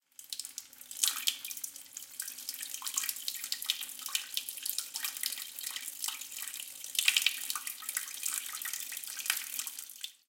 Sound of urination - Number 2
Panska, toilet